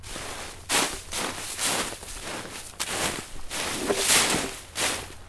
Snow and dryLeaves02
Various footsteps in snow and dryleaves
foot, ice, Snow, winter, running, frost, leaves, footstep, step, walk